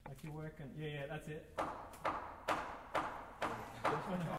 industrial hammer wood distance
hammer banging a nails 10m away